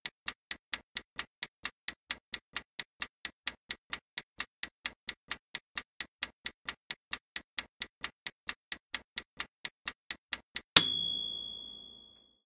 Timer with Chime
I set my toaster oven for a moment and recorded the ticking as well as the "time up" chime. Recorded with my ZOOM H2N.
chime, clock, tic, tick, ticking, timer